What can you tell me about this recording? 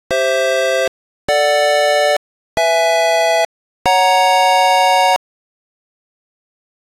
Race Start 8Bit
Simple progression of 4 chords to warm up.
I imagine this song before a race starts.
I'll love to hear you about.
warming, car, funny, start, warm, 8bit, heating, engine, simple, up, race, match, hype